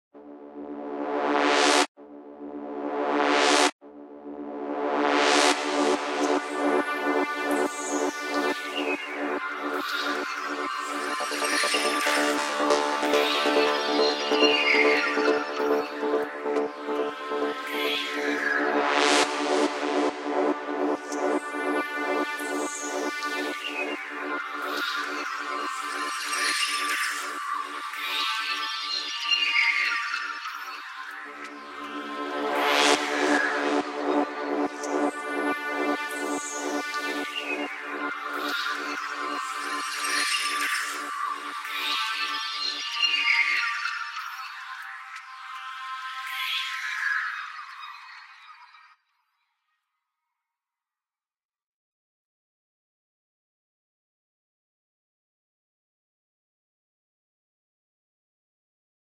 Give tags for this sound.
ambient
keyboard
pad

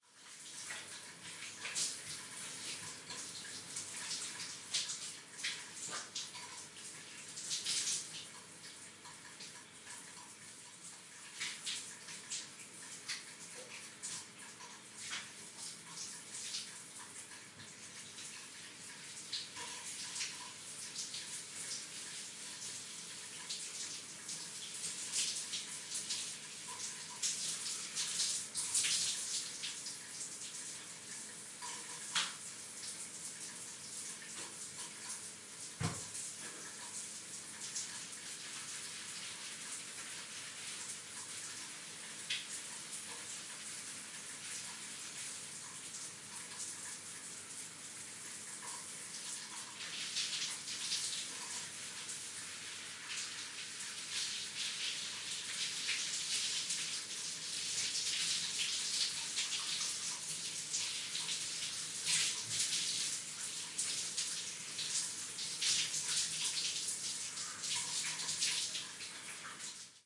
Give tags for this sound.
room
Atmosphere
hotel
noise
Free
horror
Wind
Rain
sound
Storm
Water
Shower
Wet

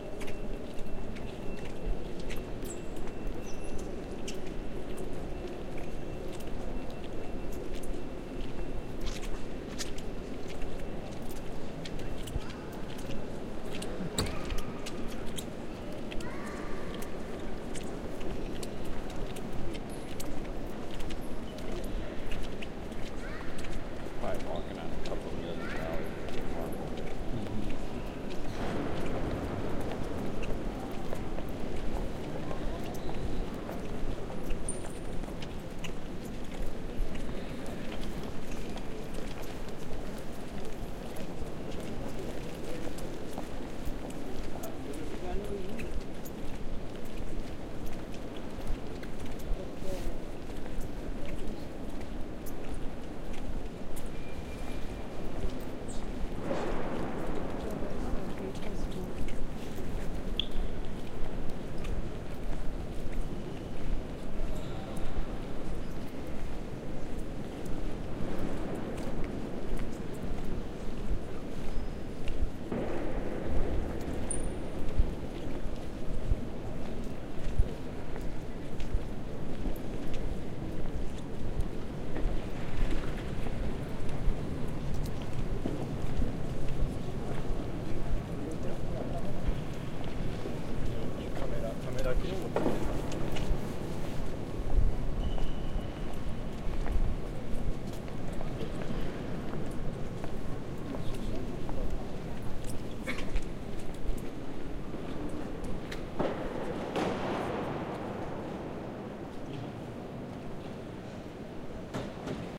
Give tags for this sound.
ambient
church
reverb
squeak
steps
vatican
walk

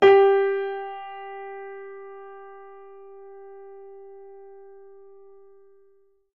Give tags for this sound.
steinway
piano
grand

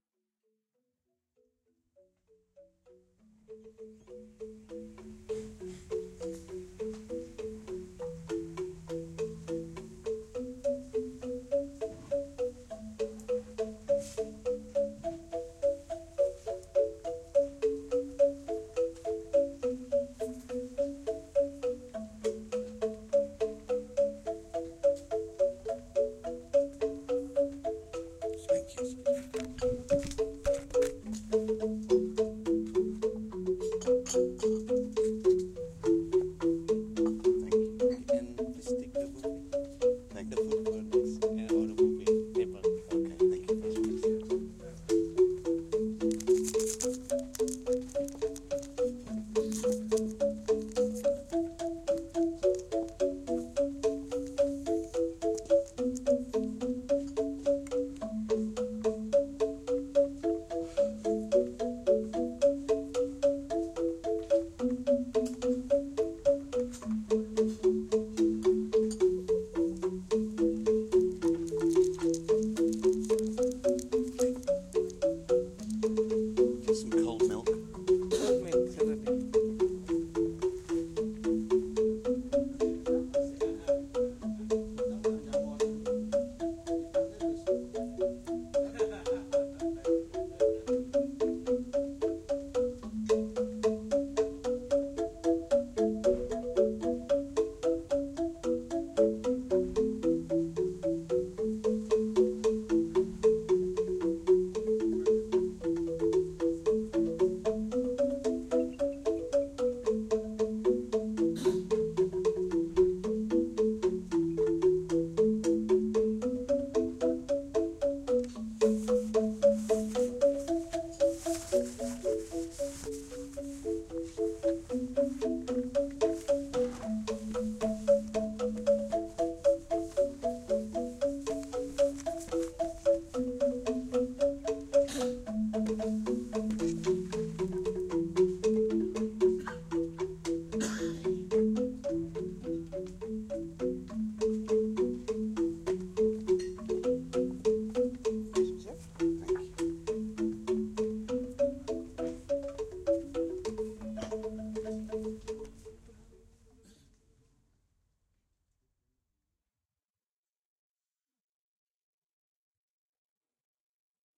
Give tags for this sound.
bali
field-recording
gamelan
music

